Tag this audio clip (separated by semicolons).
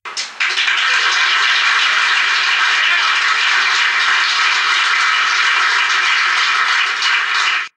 applaud; applause; audience; auditorium; cheer; cheers; clap; crowd; polite; theatre